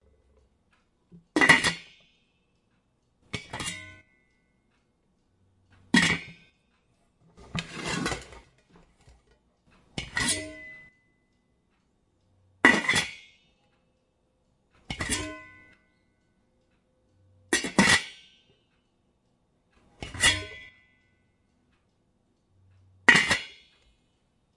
Pot Lid
I needed the sound of a domed lid being lifted off a dinner platter. I looked here but didn't see what I needed so I broke out the Zoom H2n and recorded my own (I keep forgetting that I have that). Hope you can use it.
Domed-lid, Kettle, Platter, Pot, clang, clank, cookware, kitchen, lid, metal, metallic